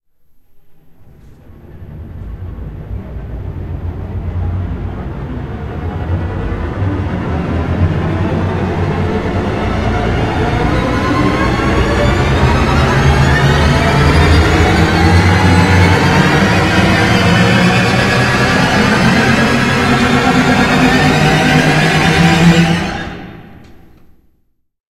Here's a scary horror violin build-up I made for any of your horror activities!
This sound was made with the mick_gordon_string_efx.sf2 soundfont.